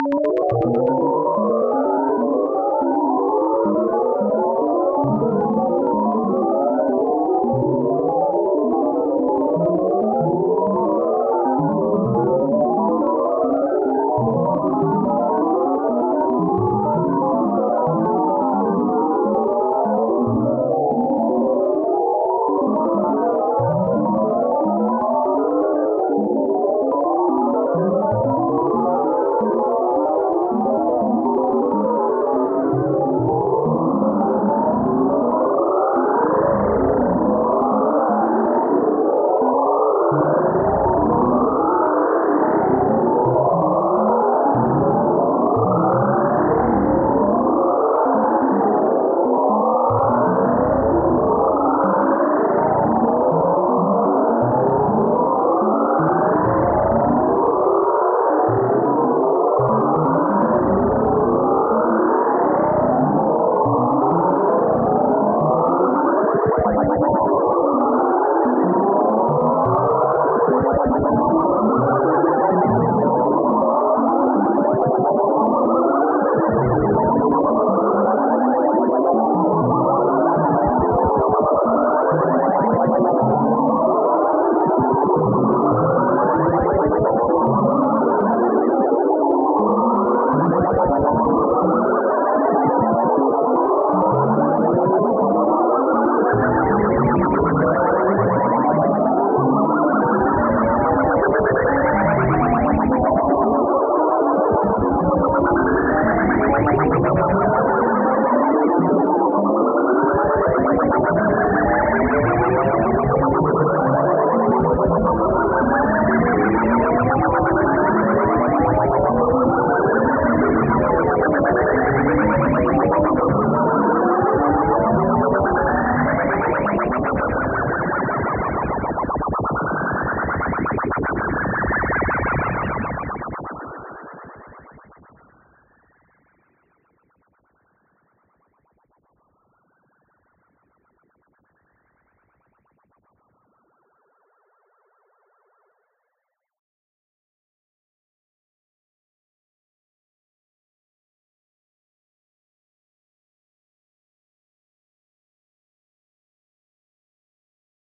It's a sound That I created with a patch I made in pure-data (My first patch btw). Completely random frequencies in completely random space imaging. Merge with au "FM" sound where the index modulation change randomly, the carrier tone and the modular tone also change randomly. I applied a random delay.... So Random Random!